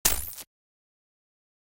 Viral Hi Hatter 04
hat, hi